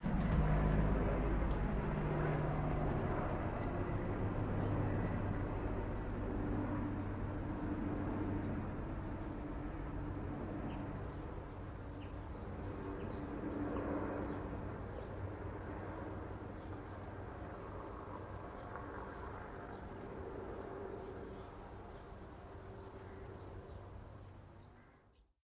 Foley, Street, Helicopter, Distant
Helicopter, Street